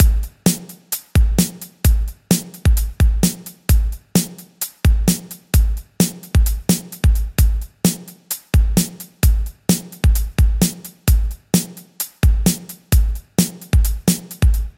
another version of romper. Drum loop created by me, Number at end indicates tempo
breakbeat, loop, drumloop, drum, beat